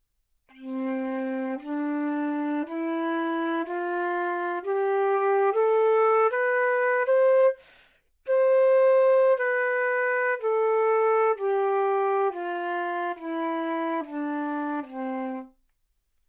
Flute - C major - bad-tempo-staccato
Part of the Good-sounds dataset of monophonic instrumental sounds.
instrument::flute
note::C
good-sounds-id::6993
mode::major
Intentionally played as an example of bad-tempo-staccato
Cmajor, scale, neumann-U87, flute, good-sounds